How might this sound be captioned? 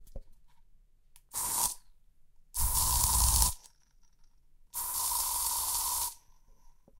Freshener spray,recorded on the zoom h5 at home